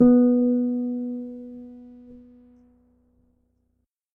my mini guitar aria pepe
notes, string, nylon, guitar